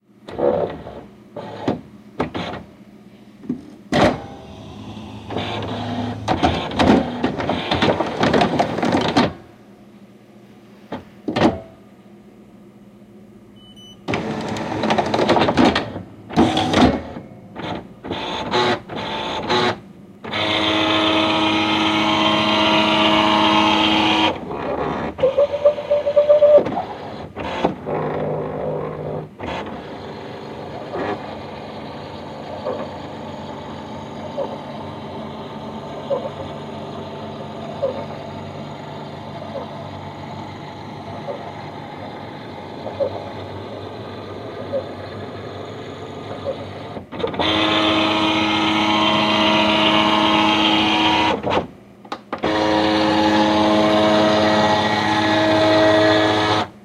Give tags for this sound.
computer mechanical Printer

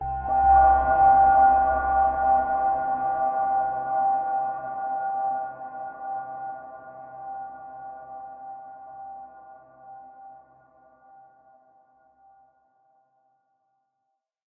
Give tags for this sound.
ambience ambient bell dark drone organ reverb

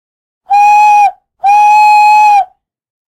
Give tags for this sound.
train whistle choochoo choo human

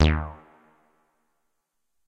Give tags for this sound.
roland space minitaur echo moog bass

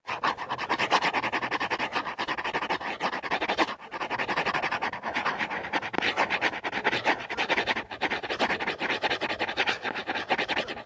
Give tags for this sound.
Cardboard Craft Marker Paper Pen Pencil Sound Stroke Write Writing

